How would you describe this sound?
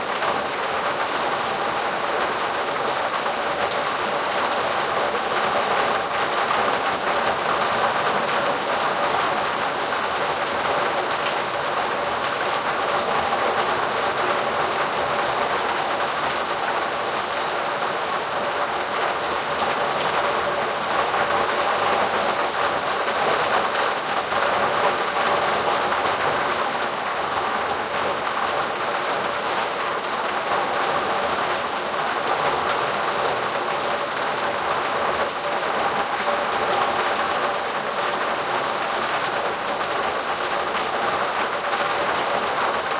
Rain on Trash Cans
Stereo recording of a heavy rain hitting plastic trash cans. Recorded from inside an open window.
field-recording, rain, exterior, weather, storm, trash